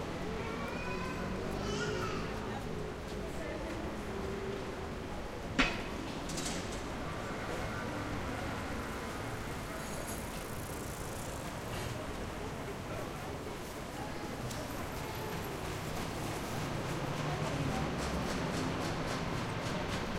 recorded in a back road in marais, Paris. rather quiet.
cars, people, marais, paris, street, footsteps, bicycle